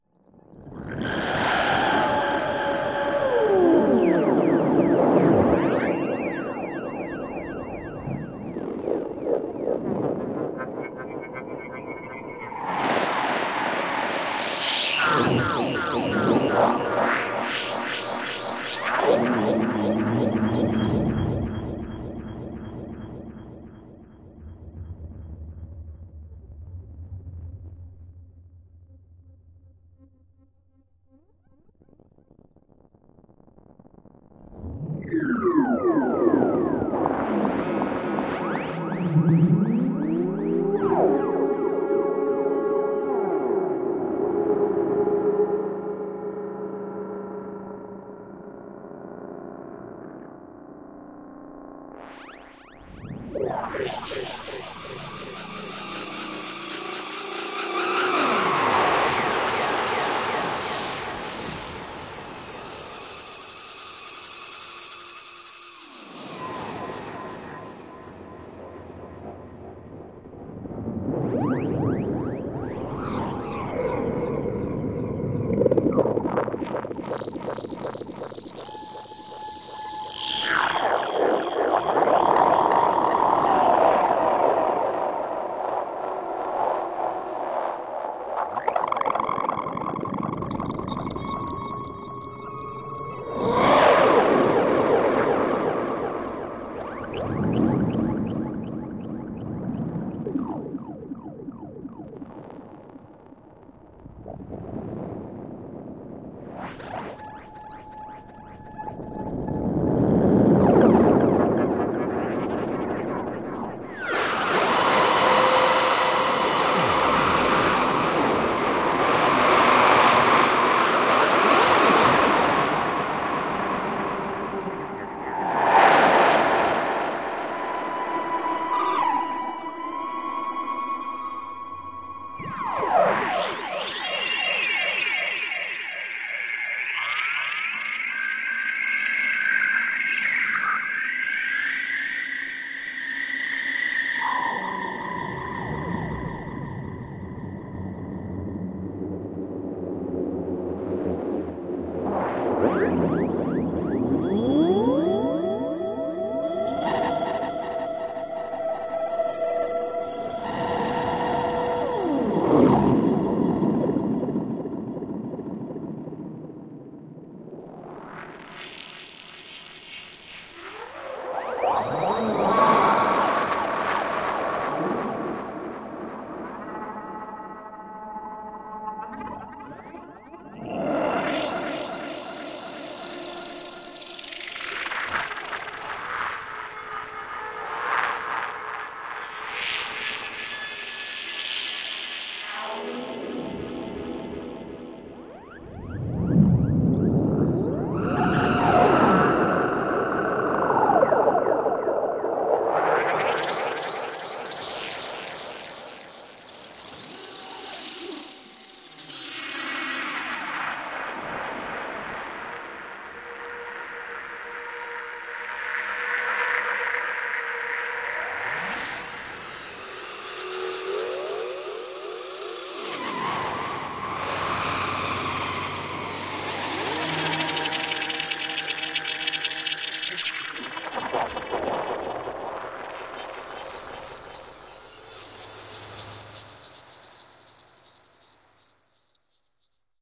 ESERBEZE Granular scape 20
16.This sample is part of the "ESERBEZE Granular scape pack 2" sample pack. 4 minutes of weird granular space ambiance. Science fiction from Nebula.
drone, effect, electronic, granular, reaktor, soundscape, space